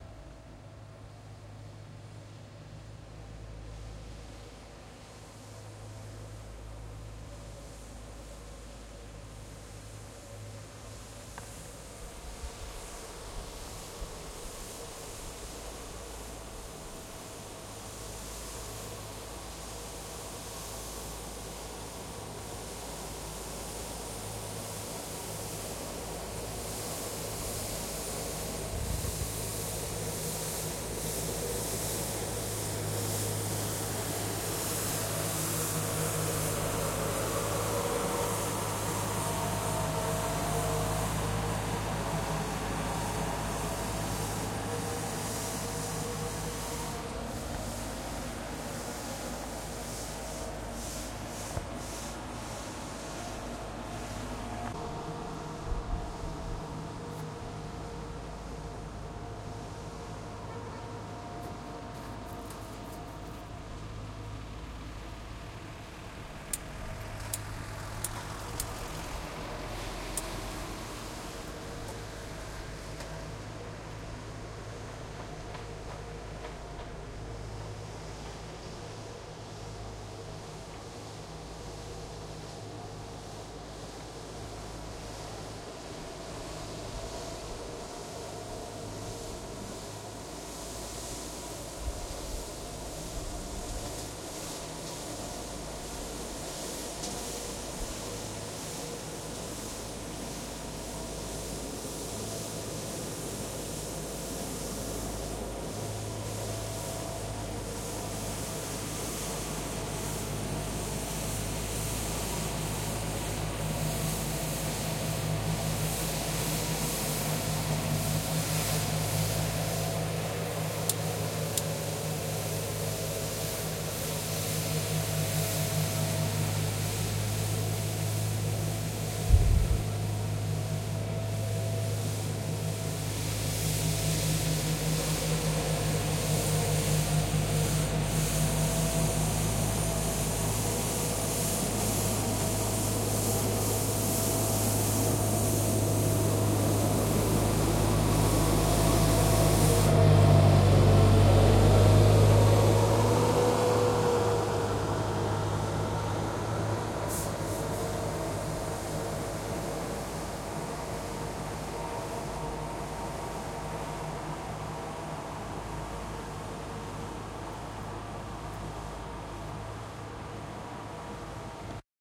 Street sweeper - original rec
recorded with tascam dr-07mkII while smoking a cig on a indow on fourth floor.
lowcut: 50Hz
ambiance, ambience, ambient, atmos, atmosphere, background-sound, field-recording, general-noise, industrial, soundscape, urban